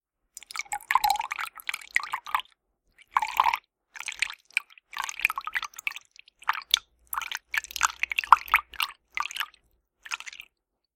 Liquid Drip
Dripping liquid into a glass.
Recorded with a Blue Yeti microphone.
dripping, liquid, water